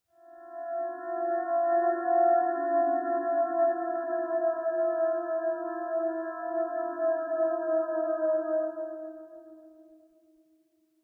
discordant voices ew54b
Strange discordant voices. Part of my Atmospheres and Soundscapes 2 pack which consists of sounds designed for use in music projects or as backgrounds intros and soundscapes for film and games.
cinematic, dark, ambience, electronic, voice, music, processed